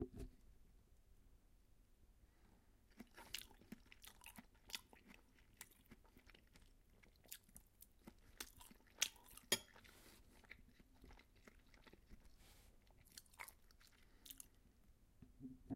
Very lound eating/smacking
smacking, loud, eating